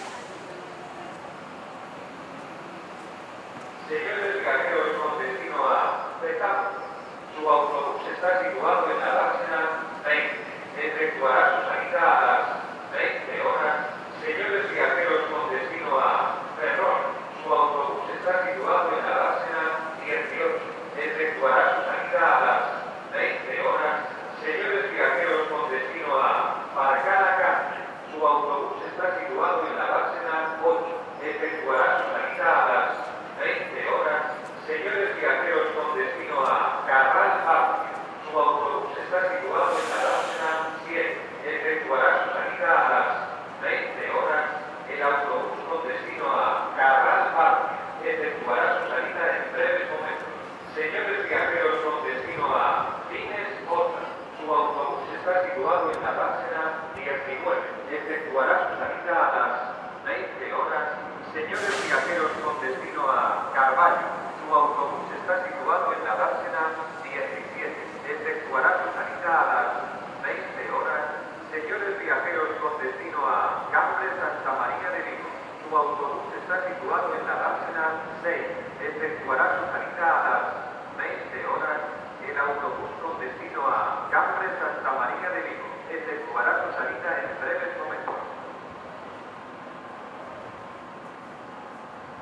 sennheiser 416 fostex fr2
maybe highpass -18dB/8ª @100Hz
bus station